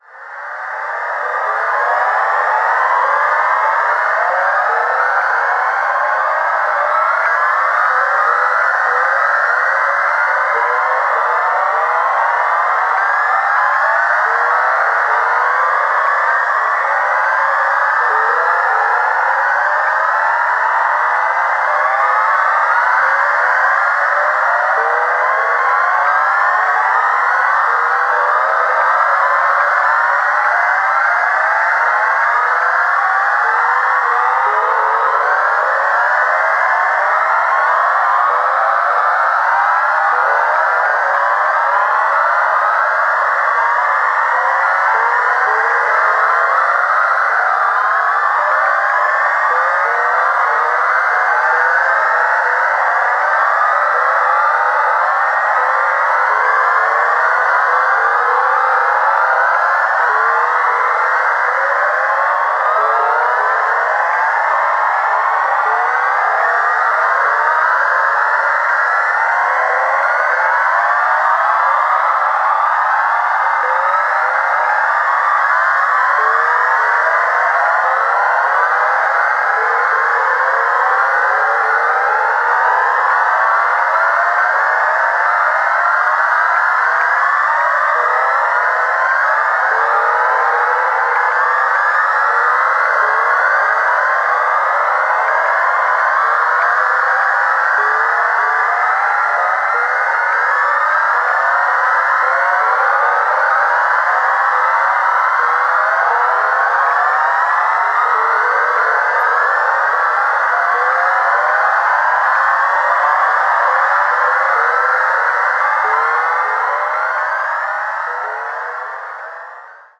This sample is part of the "Space Machine" sample pack. 2 minutes of pure ambient deep space atmosphere. Continuous pitch sweep effect.